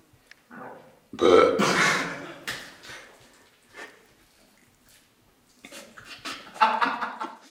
Consequences of drinking beer under Paris recorded on DAT (Tascam DAP-1) with a Sennheiser ME66 by G de Courtivron.